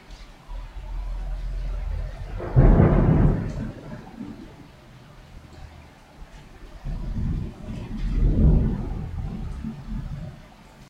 Thunder Storm Daytona 1
Thunder, storm, rain, wind, field-recording
field-recording, rain, storm, Thunder, wind